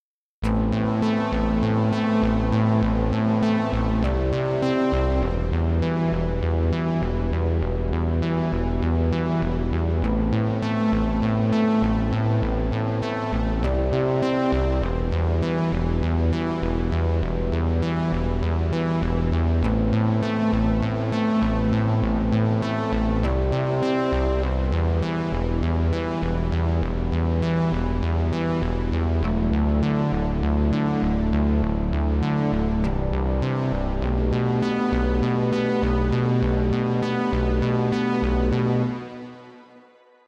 electro synth loop
loop, acid, synth, house